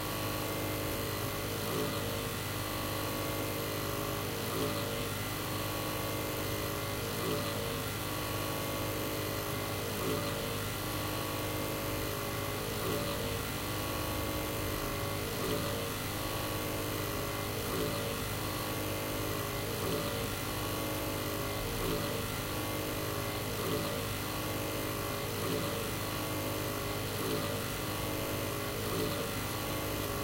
pc cooler03
My broken pc-cooler (not longer in use)